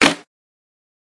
clap snare sample
clap, sample, snare